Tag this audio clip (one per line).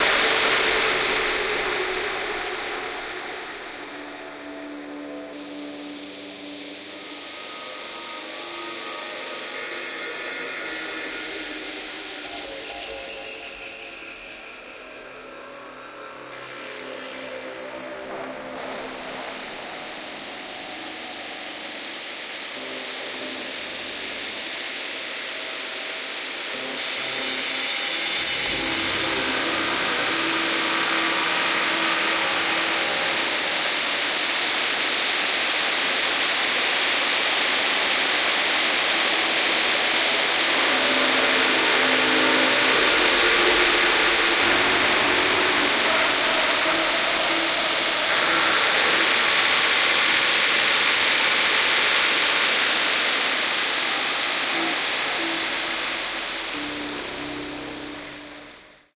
soundscape
sound-synthesis
synthscape